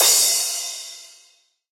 Basic-Crash
Crash I made out of 909 and acoustic crashes.
EDM, Electric-Dance-Music